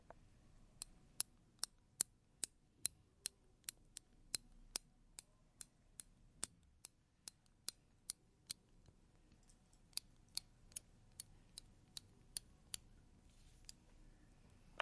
mySound Regenboog Bader
Sounds from objects that are beloved to the participant pupils at the Regenboog school in Sint-Jans-Molenbeek, Brussels, Belgium. The source of the sounds has to be guessed
mySound, Brussels, Regenboog, Belgium